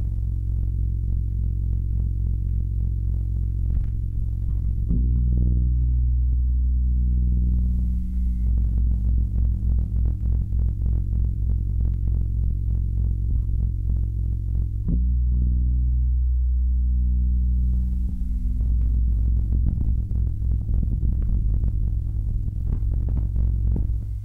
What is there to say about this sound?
cello feedback2
setting up a feedback loop w/ my monitor system and my instrument, simple max/msp
used to regulate the volume
cello, pickup, processed